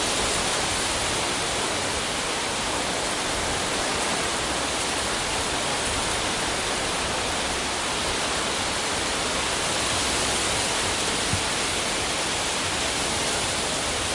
WindTree1Near
leaves sound from an big oak. Loop sound
Ambiance, Environment, Loop, Sound-Design, Tree, wind